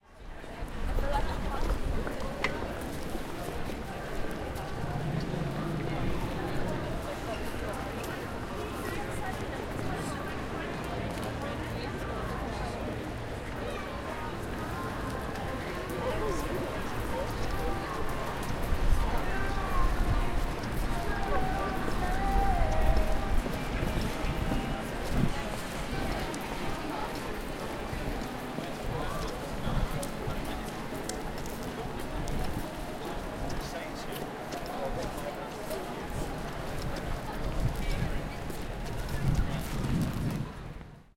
Ambience, London Street, A
Raw audio of a street in London at night with several pedestrians moving around.
An example of how you might credit is by putting this in the description/credits:
The sound was recorded using a "H1 Zoom recorder" on 19th January 2017.
street, ambiance, london, pedestrians, ambience, city, pedestrian